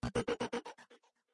cabo del 03
efeitos produzidos atraves de um cabo p10 e processamentos!!